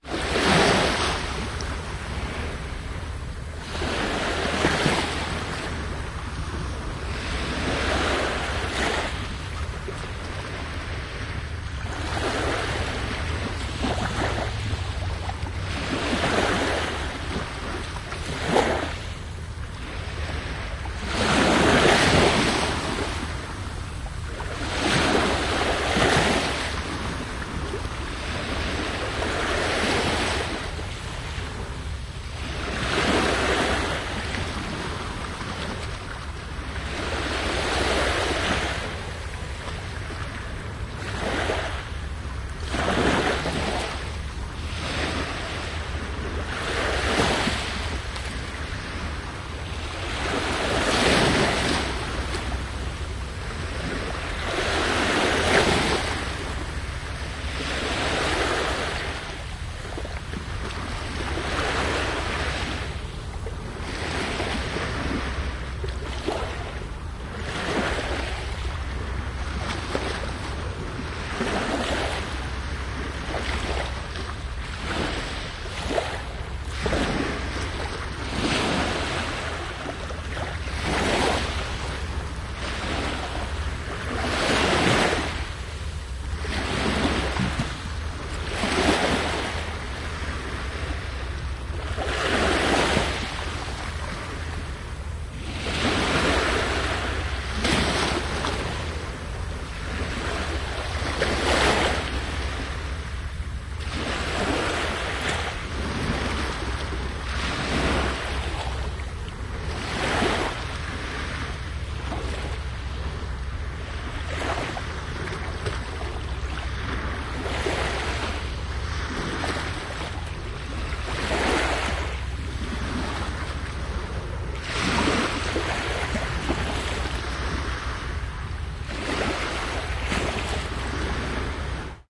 spanish banks 02
Binaural recording of waves on Spanish Banks beach in Vancouver, B.C.
binaural, environmental-sounds-research, field-recording, ocean, phonography, waves